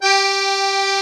real master accordeon